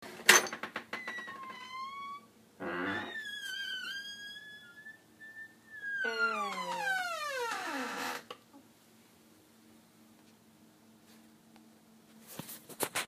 This is a door creaking.